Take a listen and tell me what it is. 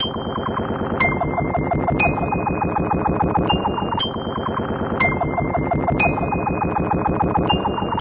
A kind of loop or something like, recorded from broken Medeli M30 synth, warped in Ableton.